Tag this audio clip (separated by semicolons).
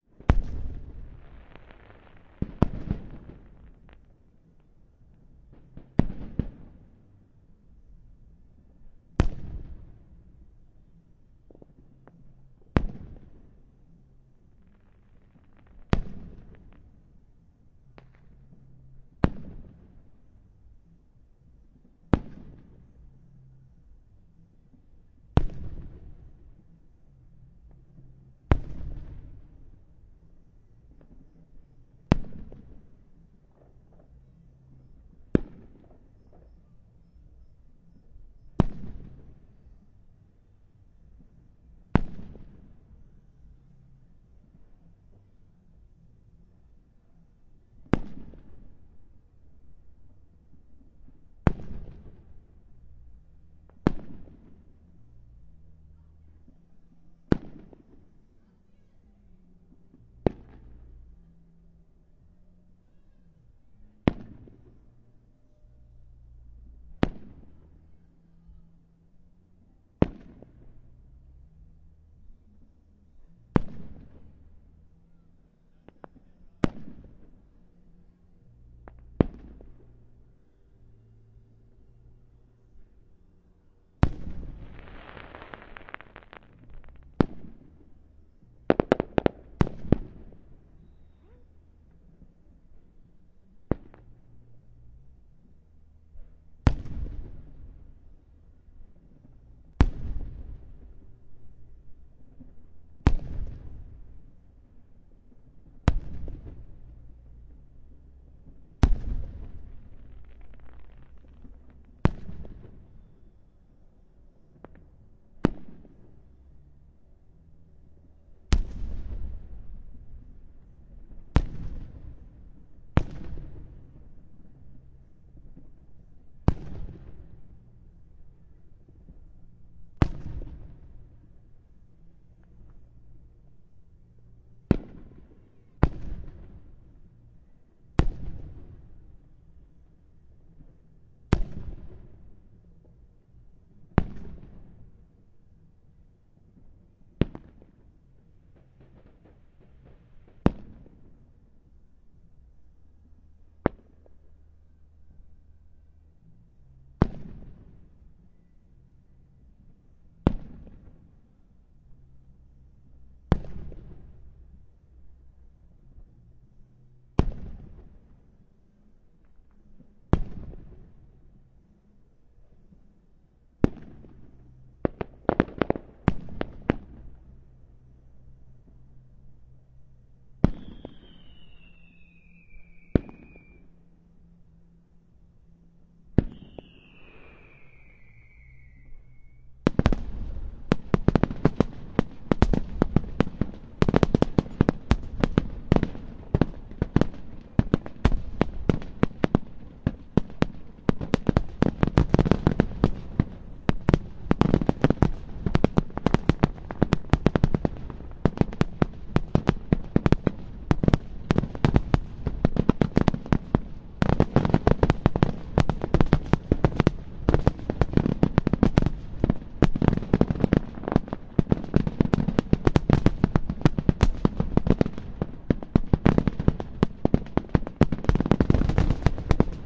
boom; explosion; Fireworks